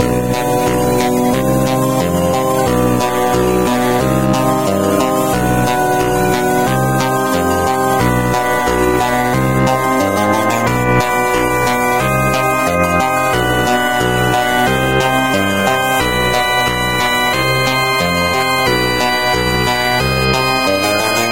fete foraine 2
Second version of my broken merry go round loop. It's a long (20 sec) out-of-tune (yes) loop (it's already cutted) made with only synths (ymVST, skyscraper, etc.). I've composed it as a small part for a little techno live concert, it can be used for something else !
180bpm, 64bit, broken, loop, out-of-tune, stupid, synth